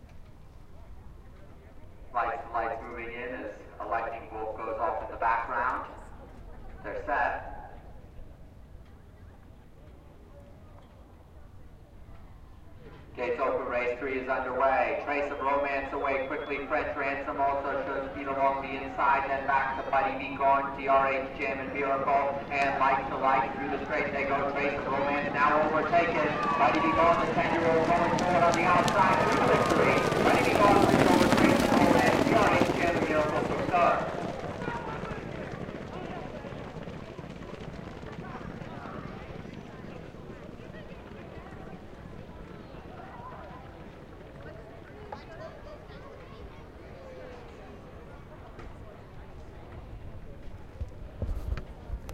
Quarter Horse race 1-2
This is the sound of horses walking by at Arapahoe Park in Colorado. It hasn't started raining yet so in this recording the track was listed as fast. The crowd sounds are relatively quiet.
horse-racing, track, horse-race, race, horse, racing, crowd, announcer, fast